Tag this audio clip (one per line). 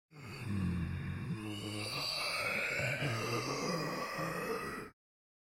monster
horror
dead-season
voice
zombie
solo
undead
groan